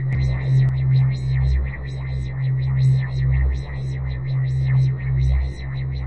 pulsing tone with a high rotating pitch
created in jeskola buzz tracker
effect, lightsaber, space